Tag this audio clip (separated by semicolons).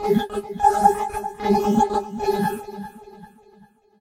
multisample; loop; vocoded; vocal